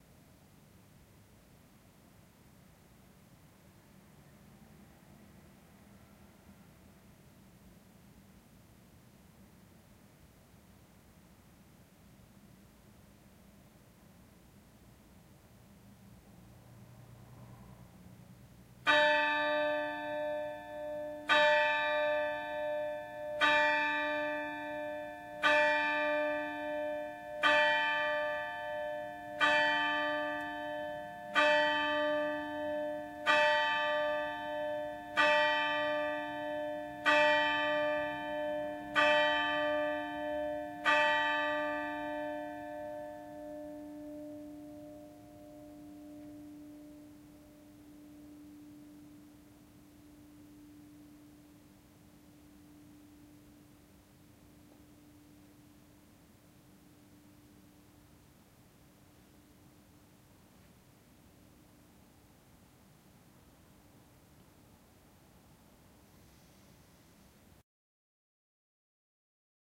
Church bell at midnight
Recorded in Reinbek, a small town next to Hamburg. Before and after the bell i left some atmo.
Recorded with a Zoom H2n. M/S.
Church, bell